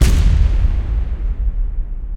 Boom Explosion
Low simple boom for impacts or explosions.
impact, low